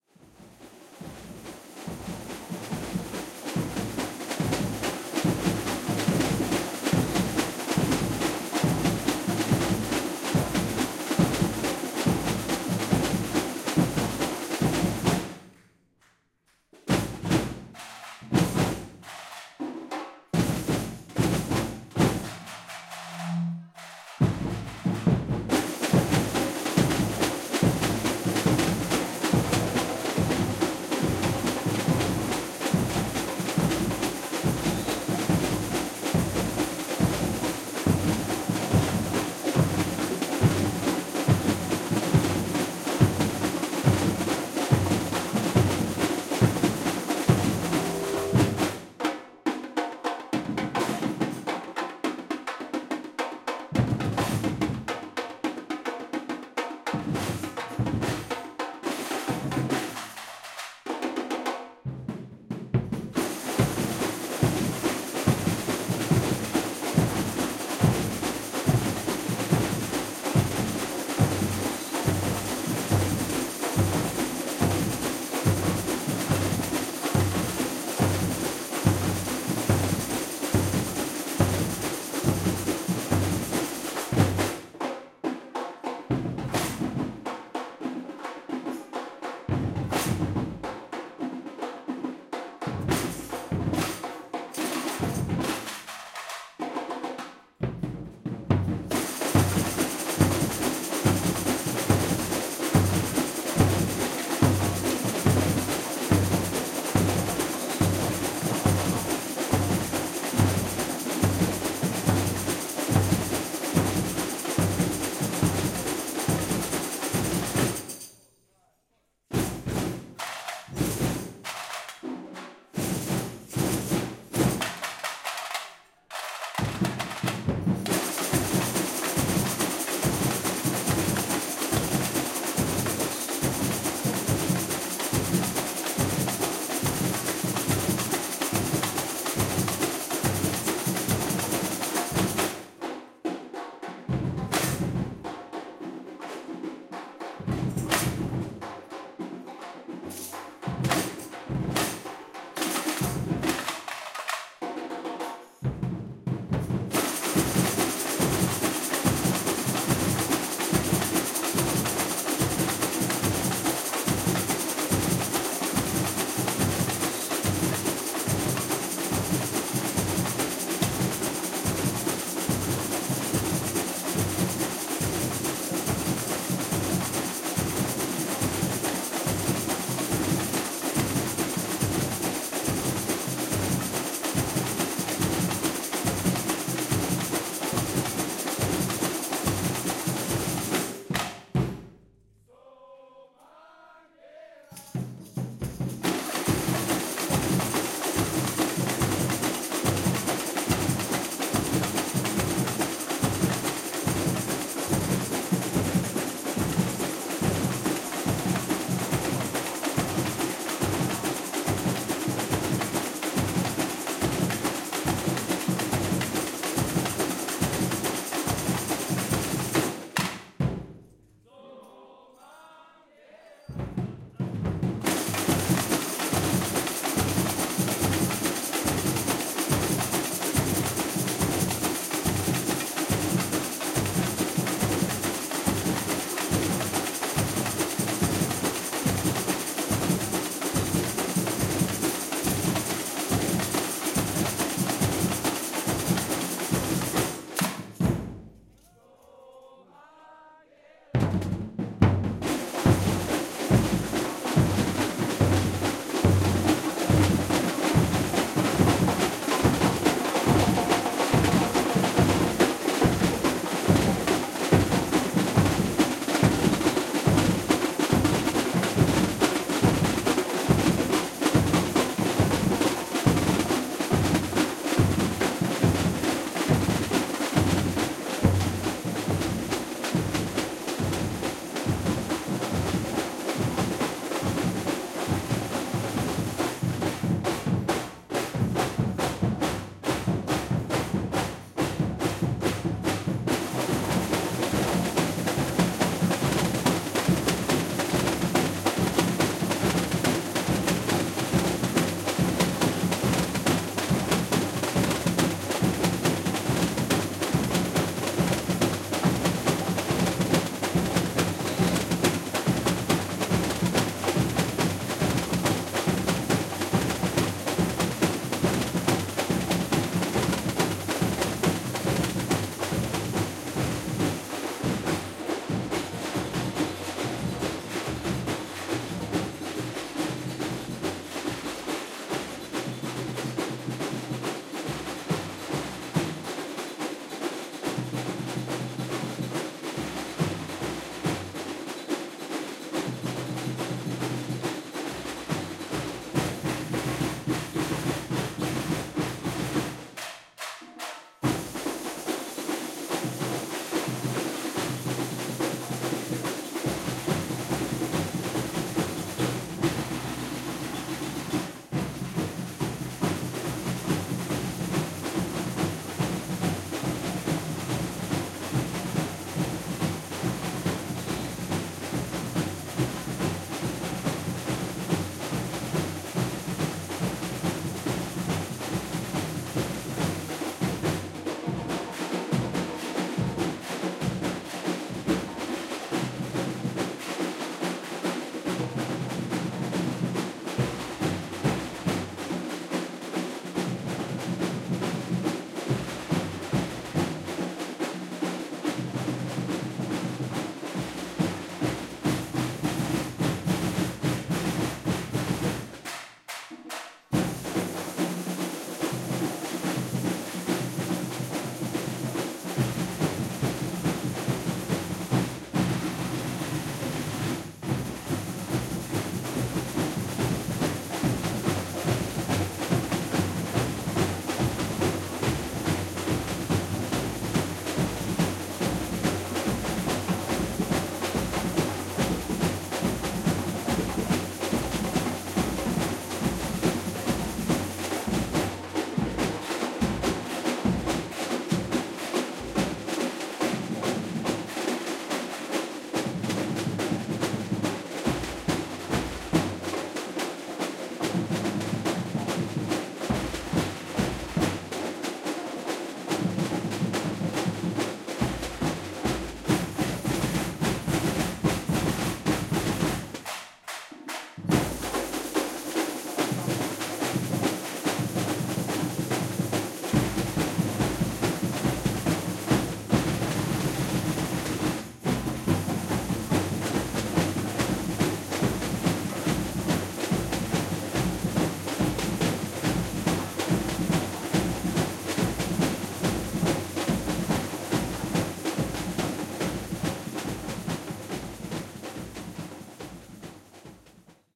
120526-000 samba bateria rehearsal mangueira style
Samba batucada rehearsal at the Berlin Carnival of Cultures June 2012 (Karneval der Kulturen). The band is playing the rhythm style of the Samba school of Mangueira from Rio de Janeiro, Brazil. Zoom H4n